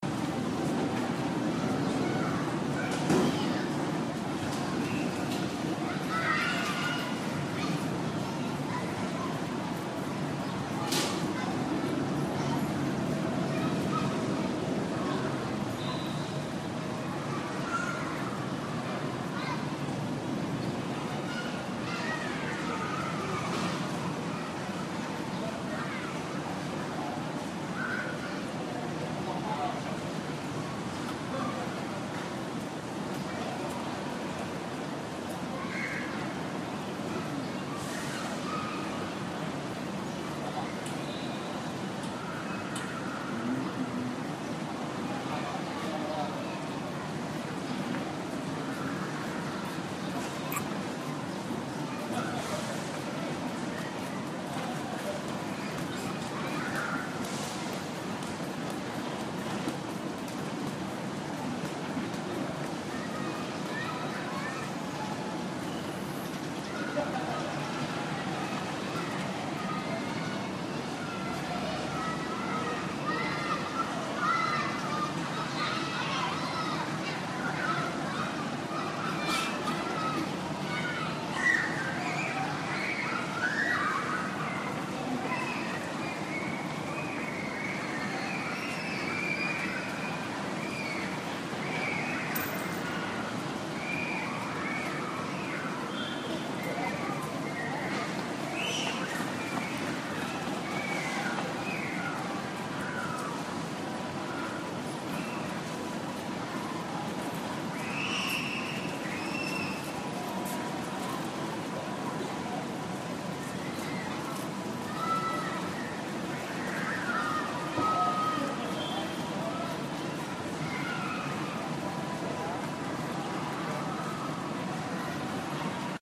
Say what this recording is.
Tai Ping Shan Ambient
Recording of street ambience in Tai Ping Shan Street, Sheung Wan, Hong Kong
ambience, ambient, asia, atmosphere, city, field-recording, hong, kong, noise, sounds, street